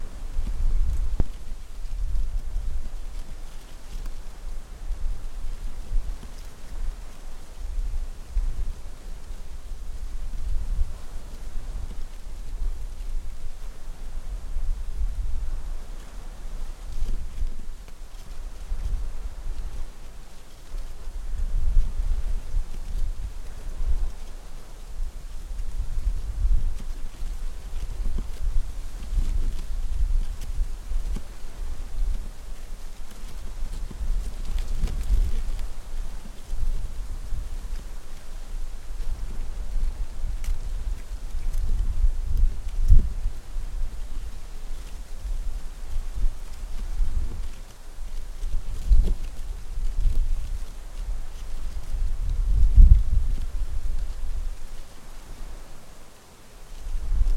Recorded near Pattaya beach far away from Pattaya City with a cheap condenser conference microphone.
Beach, Nature, Trees, Waves, Wind